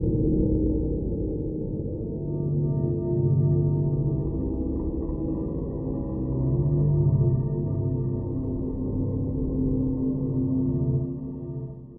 suspense metallic underwater